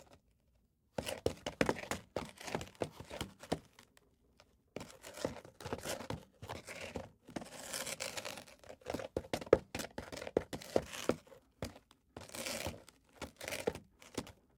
Foot steps on concrete